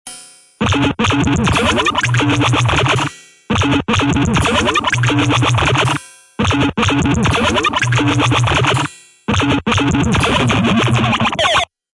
Game Pad
sounds like nintendo
game, syntth, techno